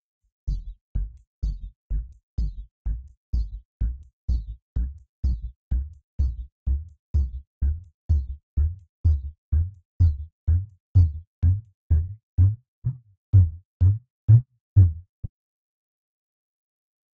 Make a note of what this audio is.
minimal-fullrange
One full octave of a messed up bass synth thing. Used LFO and a low-pass filter.
lfo, tech, loop, minimal, bass, synth